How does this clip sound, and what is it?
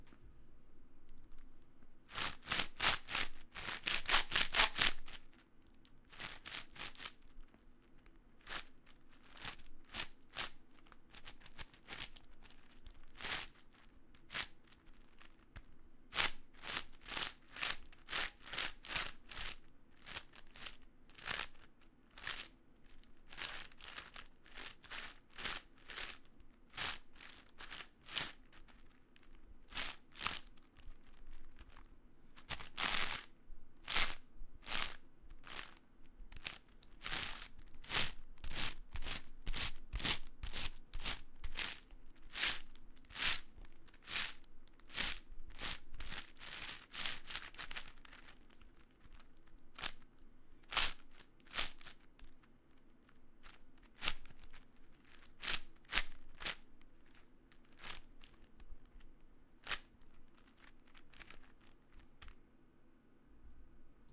Me doing a quick solve of a 10x10x10 Cube
Rubikscube, Click, Rubiks, Cube, Puzzel, Crunch